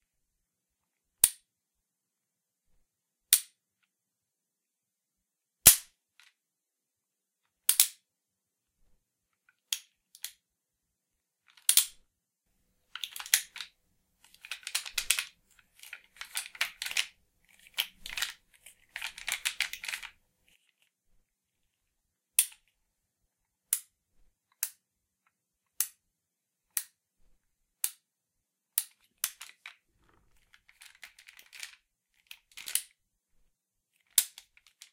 revolver clicks 02
Random clicks from a Colt Navy replica.
revolver, cock, colt, gun